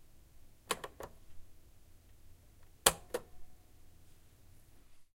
Rec + Stop 02
Recording on a vintage reel to reel recorder I found on a flea market
cassette, recorder, reel-to-reel, tape